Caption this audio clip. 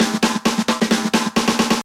Breaks Driver Fill 02
big beat, dance, funk, breaks
dance, big, breaks, beat, funk